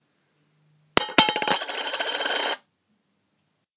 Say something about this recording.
Single coin dropped into a tin